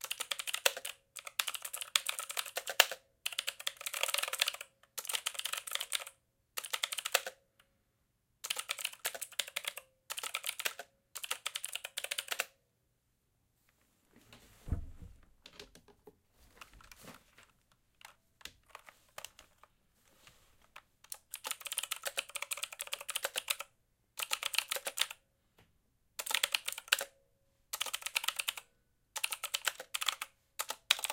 Mechanical Keyboard Typing
Mechanical, clacking, fx, computer, foley, stenographer, clicking, laptop, Field-recorder, mechanical-keyboard, typing, type, stenography, click, keyboard